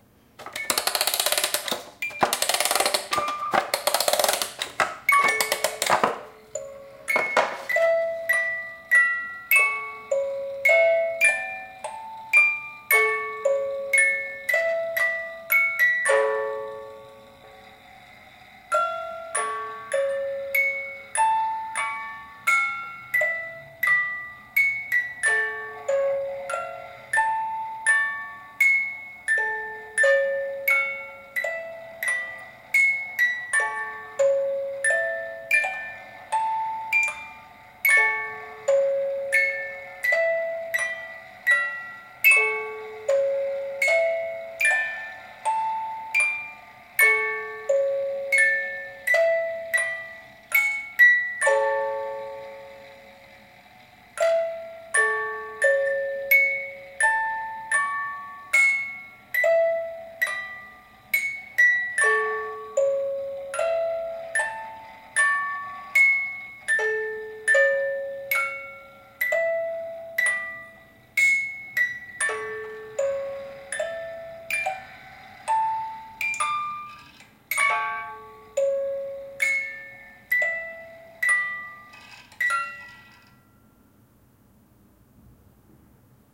20160619 music.box.37
Noise of winding up, then a music-box plays a - slightly out of tune - version of the Lourdes Hymn. Sennheiser MKH 60 + MKH 30 into Shure FP24 preamp, Tascam DR-60D MkII recorder. Decoded to mid-side stereo with free Voxengo VST plugin.
At first I thought it was a Fatima hymn but I was wrong.
melancholy, music-box, hymn, mechanical-instrument, toy, catholic, musical, winding, historical, sound-museum, church, jingle, antique, old